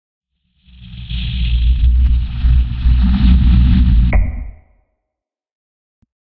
cinematic, processed, low, gesture, gran, pvoc, time-stretched, maxmsp, ableton-live, soundhack
cinematic, gesture, gran, low, soundhack